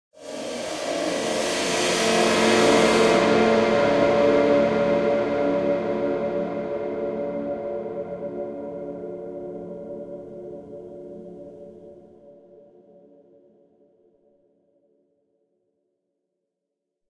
A bowed crash cymbal with some processing.
effect, transition, cymbal, bowed, fx